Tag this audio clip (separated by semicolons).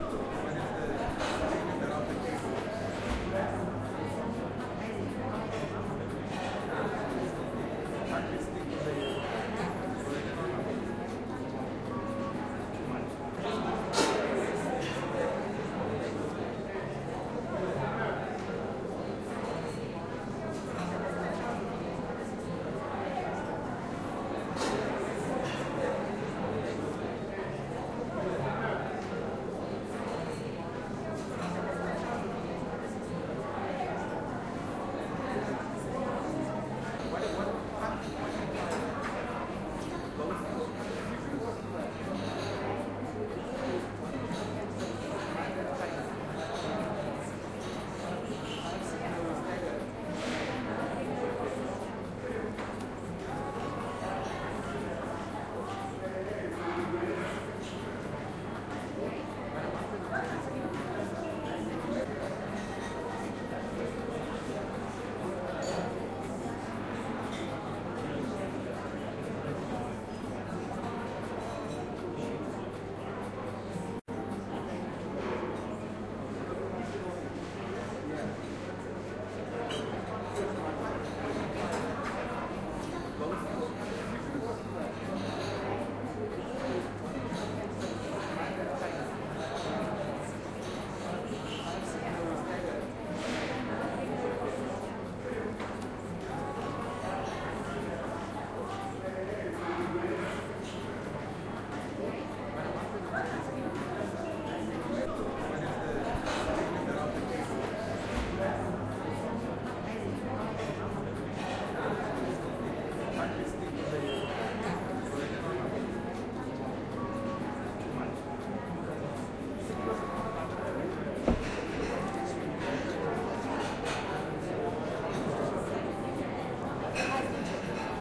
Ambiance; Conversation; Crowded; Enjoyment; OWI; People; Public; Restaurant; Talking